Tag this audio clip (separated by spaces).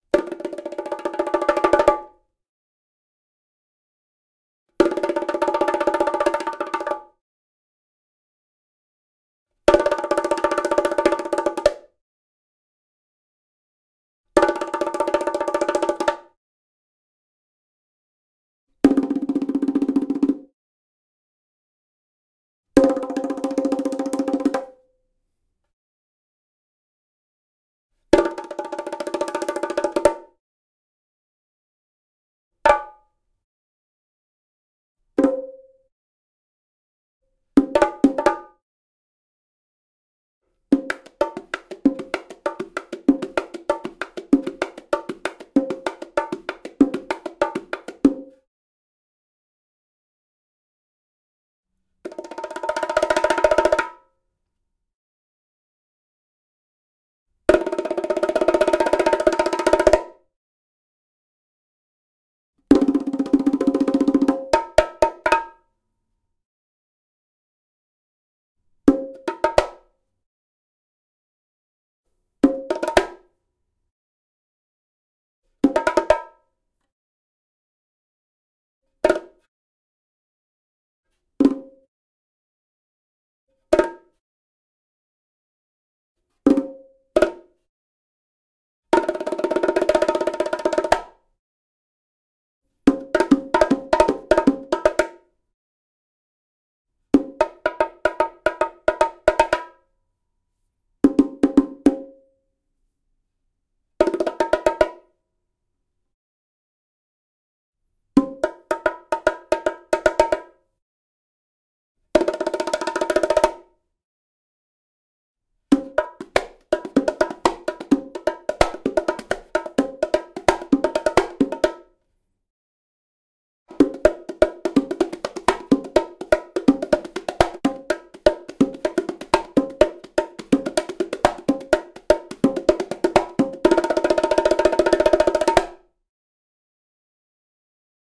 groove latin percussion